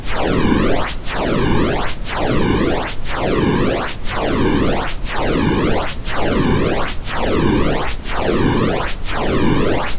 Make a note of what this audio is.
Brown noise generated with Cool Edit 96. Flanger effect applied.